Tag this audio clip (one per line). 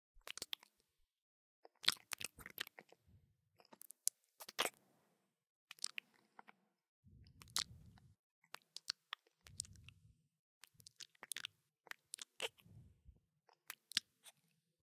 lips
monster
tongue
mouth